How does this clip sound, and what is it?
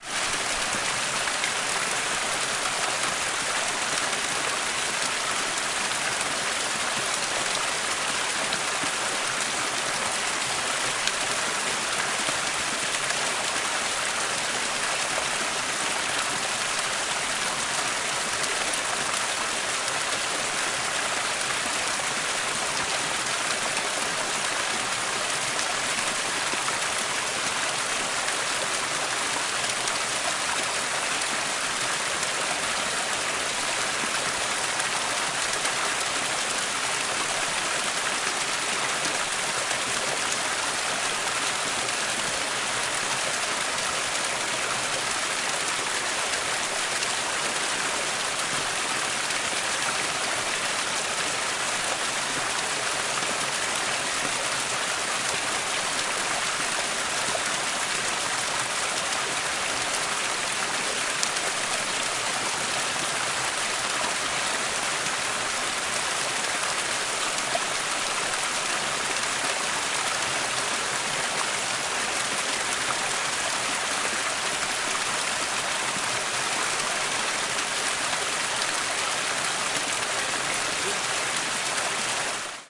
Water Stream
field-recording, river, small, stream, water